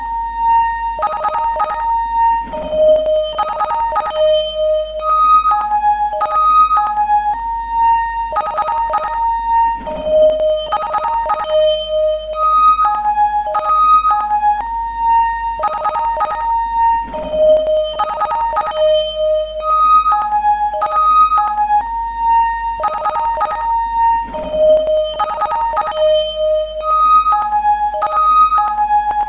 This day, Xmas eve for may, Beacon 1 changed character and was sending the new signal for an hour. Still, we earthlings have no idea what this is about.
Alien, alien-sound-effects, Broadcasting, fantasy, Futuristic, Orion, Radio, Sound-Effects, space